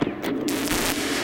some static noise
atmosphere, baikal, concrete, electronic, loop, static